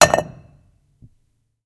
stone falls / beaten on stone